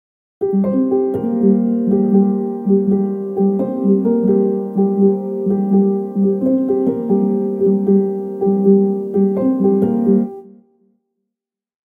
piano background 3
Just some quick piano background for the videos, I originally used it to put on a video of some DIY stuff
Not so very proud of it, but I think that will do if using in a simple projects.
That's why I'm giving it away for free. :) hope that helps!